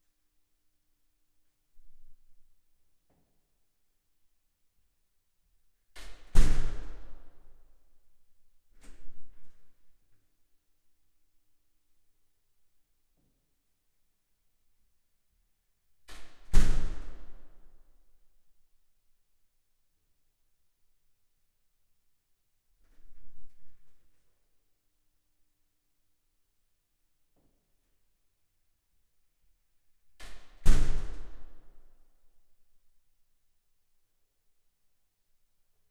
Recorder: Fostex FR-2
Mic(s): 2x Audix SCX-1 O (Omni)
Mic Position(s): in the center of a 1.5m wide, but long hallway, about 18cm apart; 2m away from door; about 1.5m height; 'outside'
Opening and closing of a heavy metal door (with big, heavy security glass inserts) within a long (flat concrete) hallway.
This recording was done on the 'outside', meaning that the door swings away from the mics while opening.
Also see other recording setups of same door within package.
metal, door